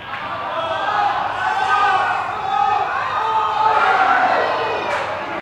20100711.worldcup.05.oh!
people in my neighbourhood shouting during the play of the 2010 FIFA World Cup match (Spain-Netherlands). Sennheiser MKH60 + MKH30 into Shure FP24 preamp, Olympus LS10 recorder